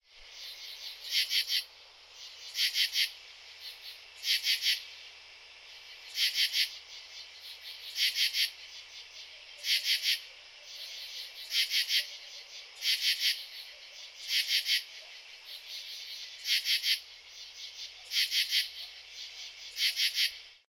Crickets recorded on August night in Mount Sinai, N.Y. in an open expanse between two large oak trees.
field-recording, insects